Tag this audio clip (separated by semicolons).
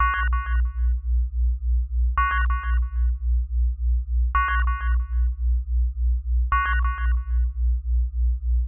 experiment; electronics; sci-fi; computer; robot; fantasy; space-war; radar; laboratory; alien; laser; space; tech; signal; science-fiction; mechanical